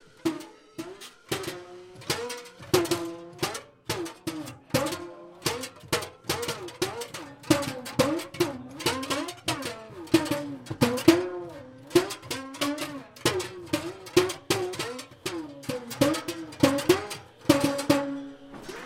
Intonomuri Documentation 02
Recording of students building intonomuri instruments for an upcoming Kronos Quartet performance.
intonomuri, workshop, woodwork